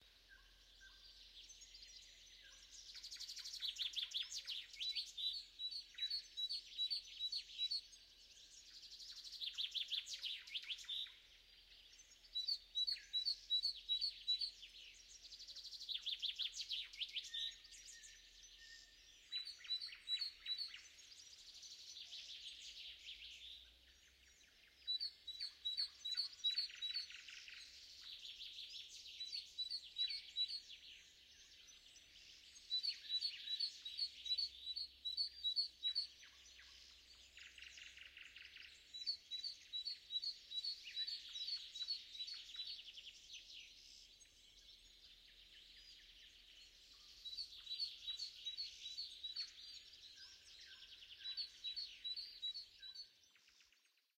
morning bird trio
This review contains a transcript of the sounds of one overnight recording session featuring bird songs, cricket choirs, wind blowing, and other sounds of nature.
These soundscapes were recorded during spring in the depths of a mixed forest where a set of microphones captured a stereo panorama. The captured soundscapes are that of a meadow with a diameter of about 100 meters that produces a multi-level echo and deep reverb.
The nature concert opens with a nightingale recorded around midnight who tirelessly varies its song for an hour until it was frightened off by a creature who made a distinct rustle of foliage not far from the bird. The nightingale sings from the bush located on the left while the recording is balanced by the choirs of crickets audible, for the most part, in the right channel. In the center of the stereo panorama, you can clearly hear the wind sir the crowns of tall trees and then gradually subside towards the end of the track.
bird, birdsong, field-recording, forest, insects, morning, nature